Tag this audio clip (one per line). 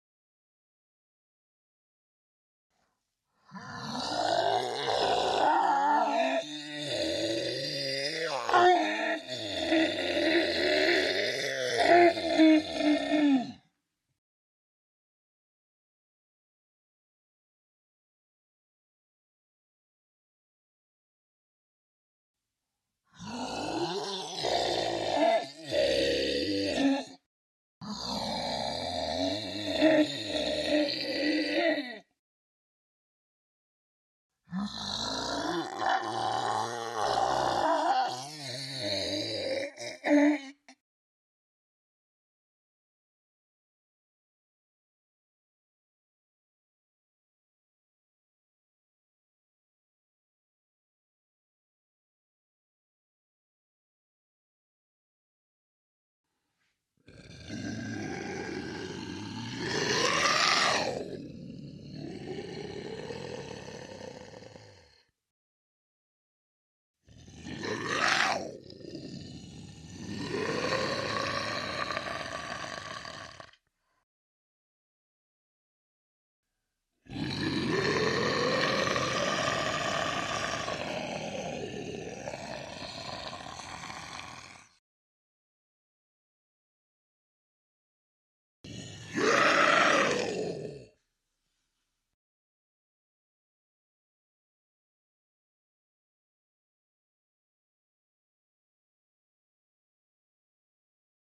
horror
monster
growl
roar
zombie
creature